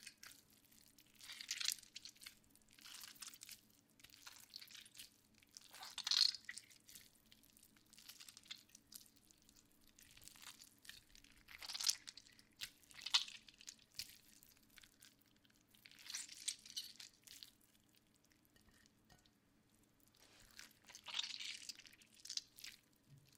Oranges being squished in hands, even squelchier than the last take. Recorded with a Rode NGT2 mic into an M-Audio Fast Track Pro and Sony Vegas. Recorded in my kitchen.
Squelch, NGT2